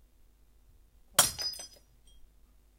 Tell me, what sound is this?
breaking ceramic cup
ceramic, cup